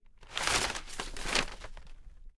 Paper Throw Into Air; 3
Paper being thrown into the air.
fall, paper, throw